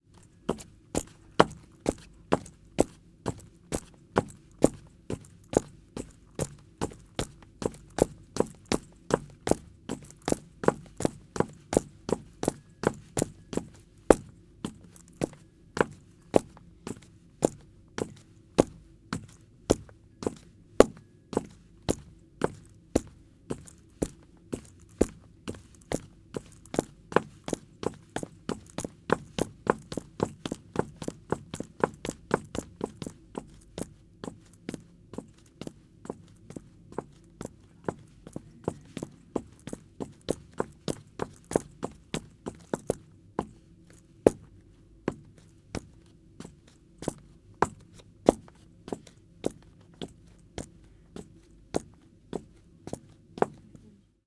Nome da fonte sonora: andando de bota .
Efeito sonoro gravado nos estúdios de áudio da Universidade Anhembi Morumbi para a disciplina "Captação e Edição de áudio" do cruso de Rádio, Televisão e internet pelos estudantes:Cecília Costa Danielle Badeca Geovana Roman Tarcisio Clementino Victor Augusto.
Trabalho orientado pelo Prof. Felipe Merker Castellani.
bota, rtv, passos